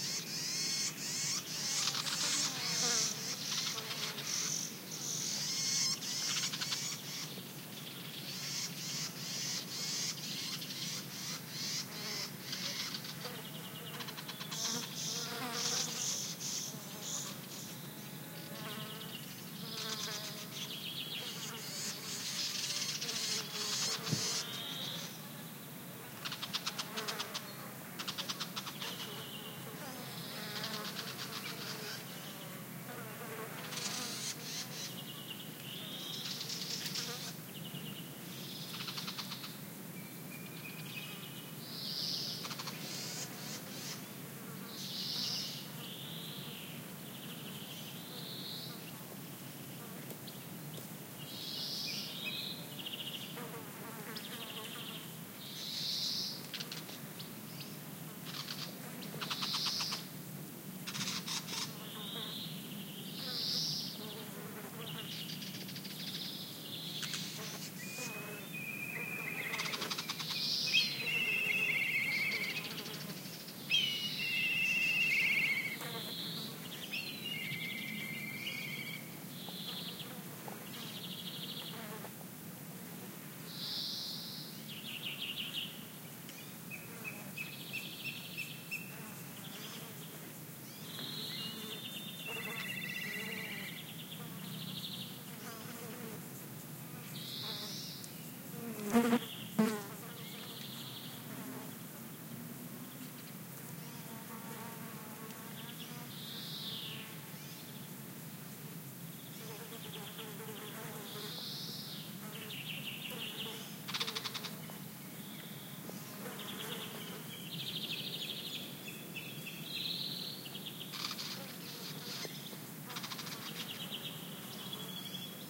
20070722.jay.warbler.kite
Kite, Jay and warbler calls in a pine forest near Aznalcazar, S Spain. Sennheiser MKH60 + MKH30, Shure FP24 preamp, Fostex FR2LE. Mid-side stereo decoded to L/R with Voxengo VST plugin.
field-recording, south-spain, nature, ambiance, birds, summer